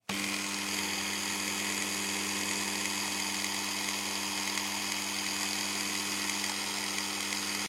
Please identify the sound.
Something with a motor
Drill, Power, Tool